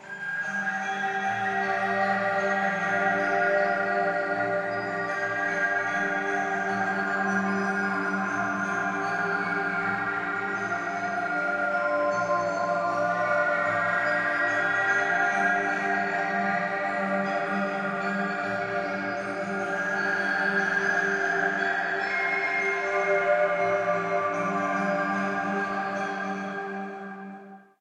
FX Sad John
A processed sample from a musical box (spieluhr)